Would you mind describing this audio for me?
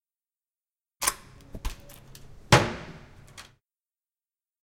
Locker close
This sound shows the noise that a locker does when someone is closing it.
campus-upf, Close, Coin, Key, Locker, Tallers, UPF-CS14